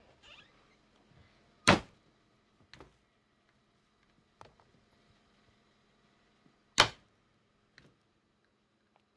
Cabin Porch Screen Door slamming
Closing wood cabin screen door with rusty spring
cabin, close, creak, creaky, door, open, screen, squeak, squeaky, wood, wooden